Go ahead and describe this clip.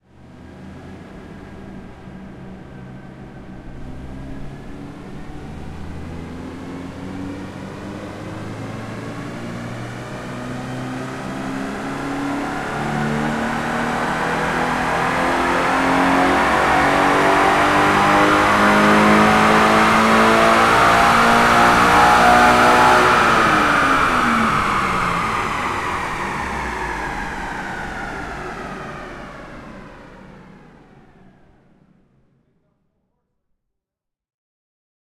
DynoJet Scion FR-S
This is a recording taken by a Tascam DR-40 interal mics mixed with external Sennhesier ME-66. Tascam recorded rear exhaust of a stock FR-S on a dynojet while Sennhesier recorded engine bay.
Mixed to generate what it was like to stand in the room at the side of the car.
BRZ, field-recording, Subaru, Toyota, engine, frs, scion, fr-s, rev, dyno